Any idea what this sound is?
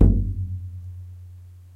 Nagra ARES BB+ & 2 Schoeps CMC 5U 2011.
bass drum hit on the hand
bass, drum, hand